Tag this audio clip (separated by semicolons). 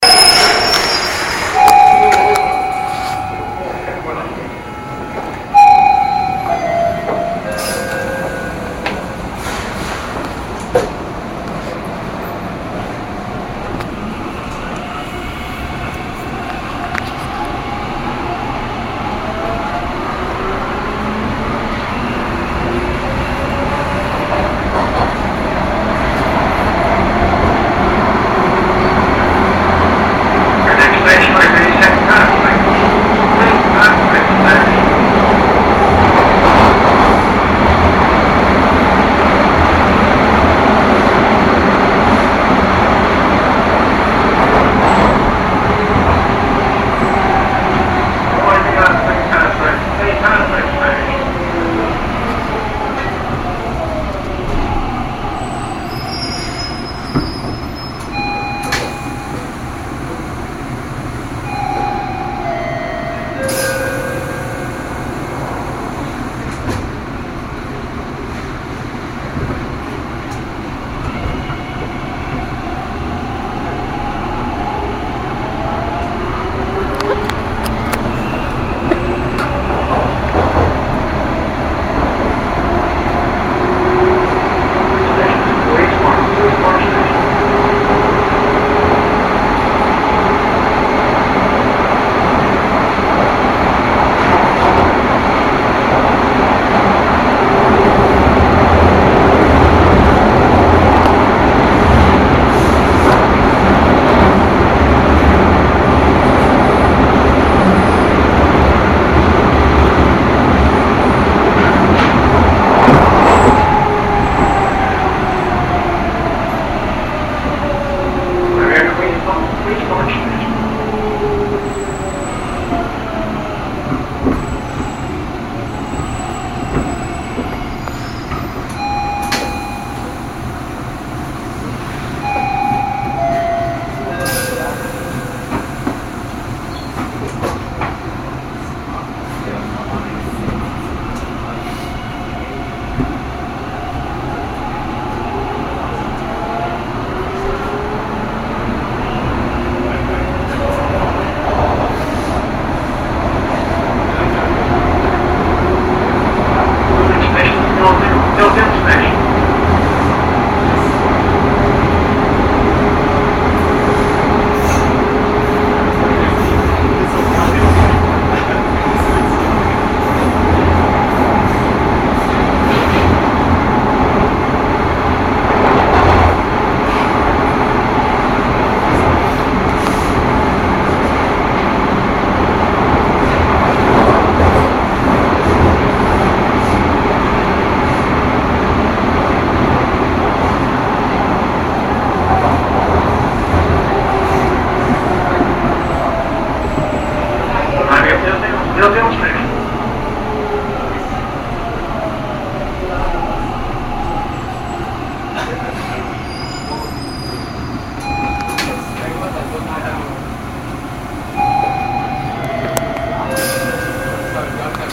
field-recording; subway; ttc